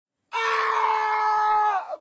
Wilhelm scream alternative Death scream human shout agony KT CsG
scream, shout, agony, pain, Death